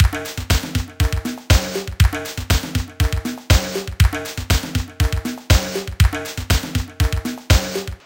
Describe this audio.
reminds me the turkish pop songs of 90's.
4, drum, loop, bar, tempo, bpm, latin, sample, nineteens